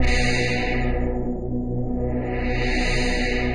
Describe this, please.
135 Gritler Synth 01
hard club synth